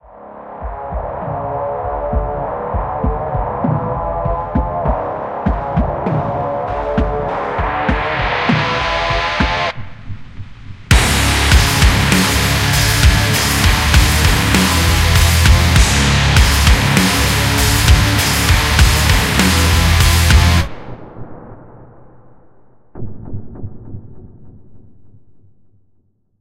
Heavy Lowtuned Metal Groove
A short intro and groove inspired by DOOM 2016 OST.